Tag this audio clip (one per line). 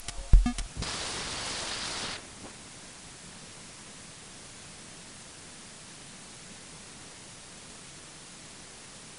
network
generative
recurrent
neural
char-rnn